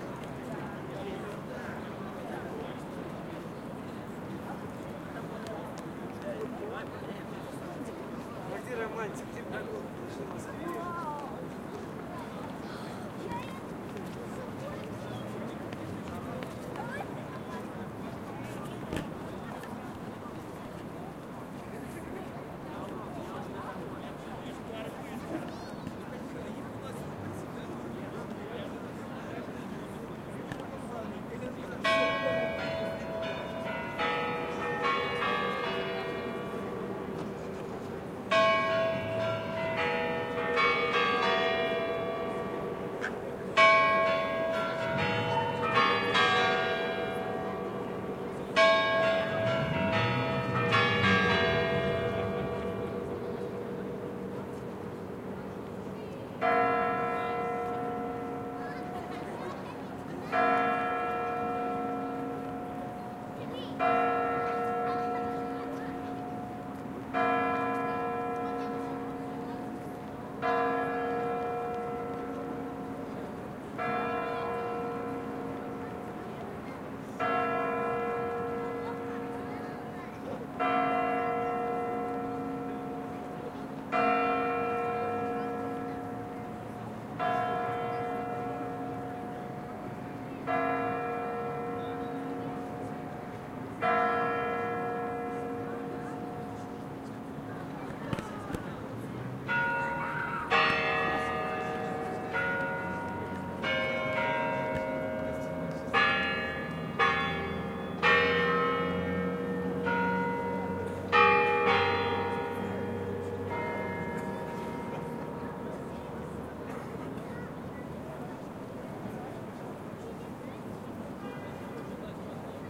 Kremlin chimes on Red Square at midnight
chimes, midnight, square, russia, red, moscow, field-recording, kremlin, city